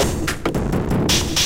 Loops and Such made from the Stickman DiSSorted Kit, taken into battery and arranged..... or. deranged?
beat, stickman, heavy, ni, distorted, like, harsh, remixes, metal, drums, treated, processed